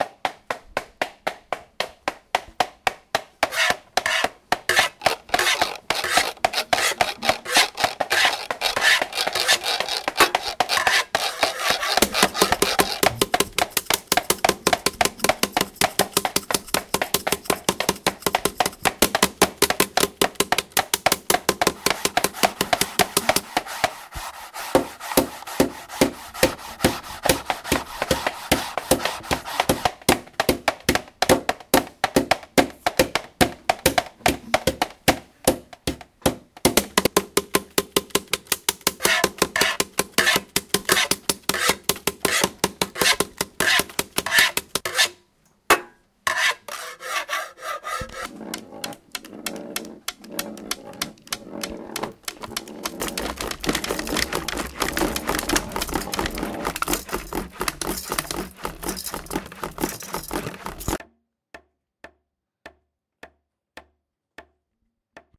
Soundscape GWAEtoyIS soundstory001
Genius Hour and radio club students from GEMS World Academy Etoy IS, Switzerland used MySounds from Pacé, in Ille-et-Vilaine students to create this composition.
TCR, Soundscape